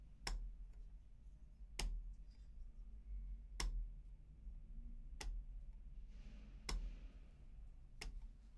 Es el sonar de un interruptor al encender o apagar
switch, lever